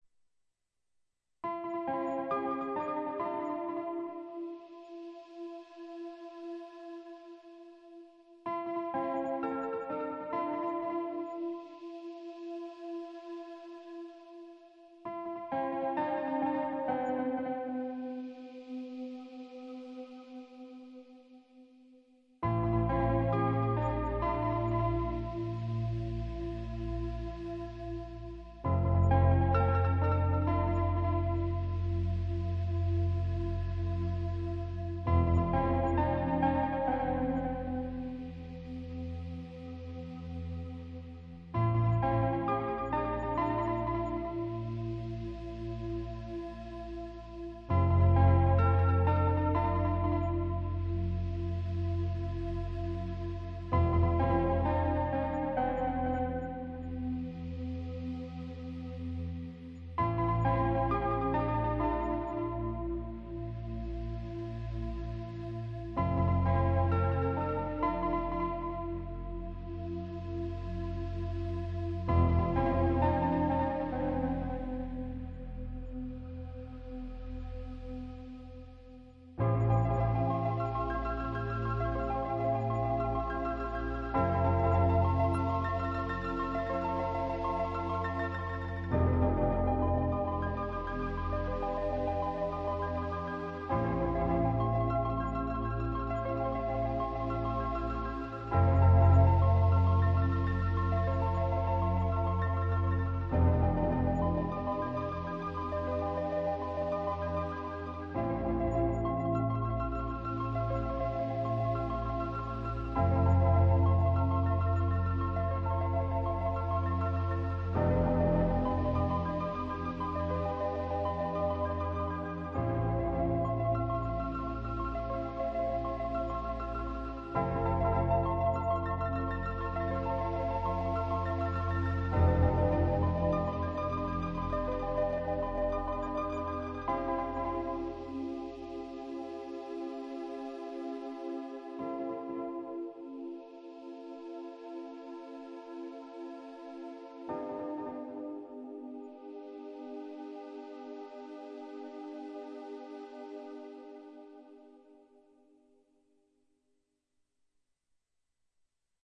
relaxation music #48
Relaxation Music for multiple purposes created by using a synthesizer and recorded with Magix studio.
Like it?
ambience, noise, synth, atmosphere, relaxation, music, electronic